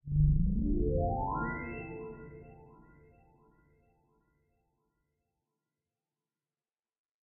A happy sound reminiscent of Paper Mario made with an instrument I synthesized in Harmor VST within Fruity Loops Studio.
Game, Success, Synthesized, Win